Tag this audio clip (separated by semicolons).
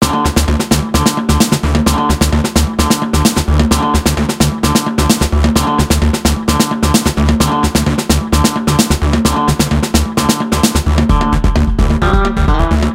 audio-library; background-music; download-background-music; download-free-music; download-music; electronic-music; free-music; free-music-download; free-music-to-use; free-vlogging-music; loops; music; music-for-videos; music-for-vlog; music-loops; prism; sbt; syntheticbiocybertechnology; vlog; vlog-music; vlogger-music; vlogging-music